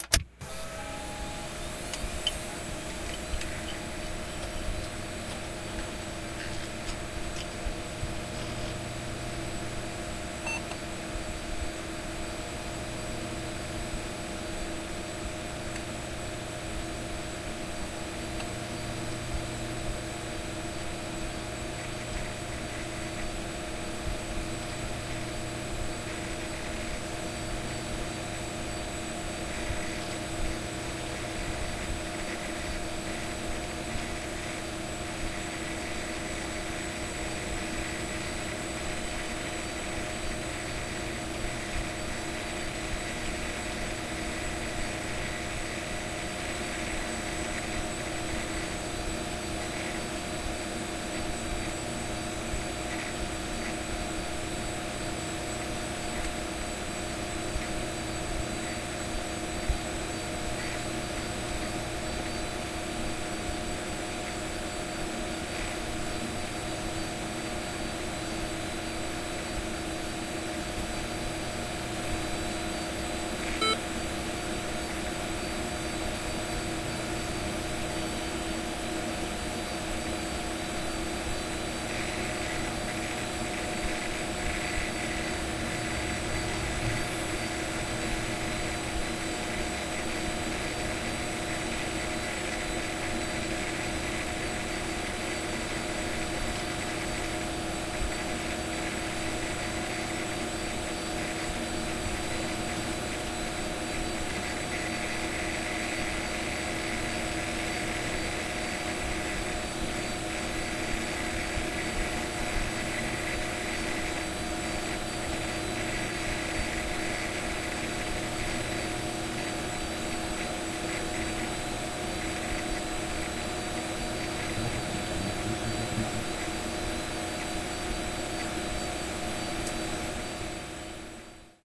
This is a rather noisy file server with several hard drives and fans, recorded with the microphones inside the case. This was recorded in 2005 with a Sony MZR-700 minidisc recorder, an outboard Church Audio preamp, and a set of Linkwitz modified Panasonic WM60 capsules.